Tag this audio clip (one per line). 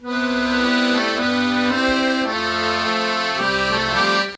instrument
accordian
environmental-sounds-research